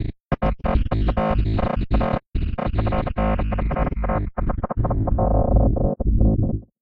Abstract Drilling Effect
Please check up my commercial portfolio.
Your visits and listens will cheer me up!
Thank you.
electronic, synth, sfx, soft, drill, electronica, digital, effekt, Abstract, effect, software